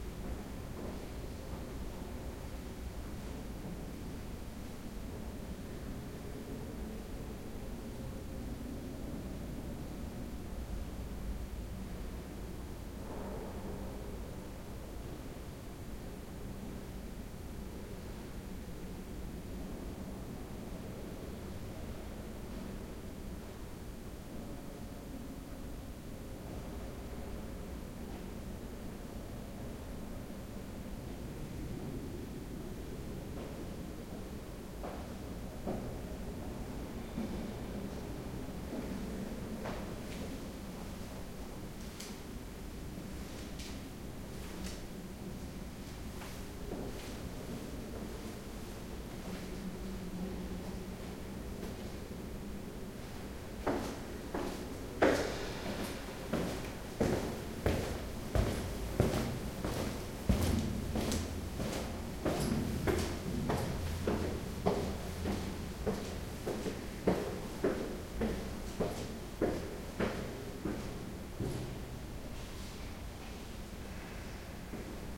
02 Mainz Cathedral western crypt
These recordings were made during a location-scouting trip I took some time ago to southern Germany, where we had a look at some cathedrals to shoot a documentary.
I took the time to record a few atmos with my handy H2...
This is an atmo of the crypt beneath the western choir of the Mainz Cathedral.
Field-Recording, Walking, Large, Cathedral, People, Tourist, Hall, Leisure, History, South, Germany, Atmosphere, Architecture